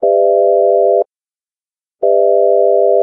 Major Sixth Chord
A major 6th chord. The following just tuning was used (1,3,5,6) from the root:
1/1,5/4,6/4,5/3
chord, chords, equal-tempered, intonation, just, major-sixth